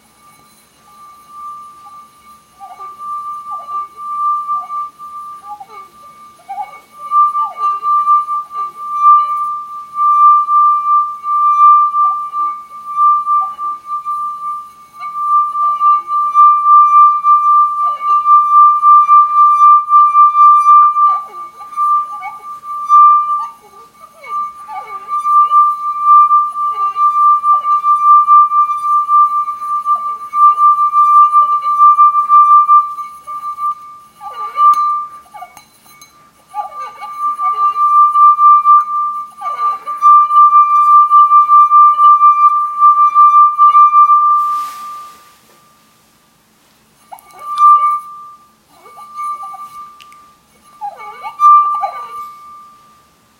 Wine Glass Resonance
A wine glass made to resonate by running a wet finger around the rim of the glass. This recording has slight clicks as the camera uses the automatic gain control. I would not recommend this for serious recording. Recorded on a Canon D550 camera.